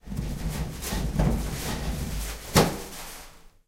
Grabación del sonido de estirar papel de wc de un lavabo del campus Upf-Poblenou. Grabado con Zoom H2 y editado con Audacity.
Recording of the sound of toilet paper in a bathroom in Upf-Poblenou Campus. Recorded with Zoom H2 and edited with Audacity.
UPF-CS13, campus-upf, papel, lavabo, wc